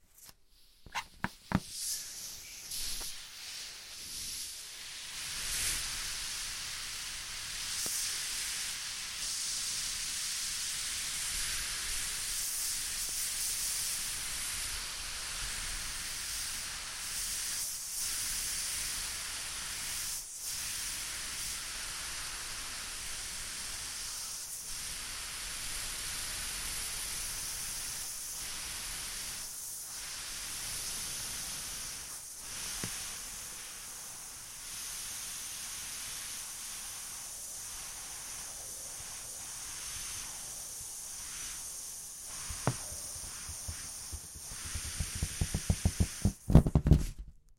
Balloon Deflate Long 3
Recorded as part of a collection of sounds created by manipulating a balloon.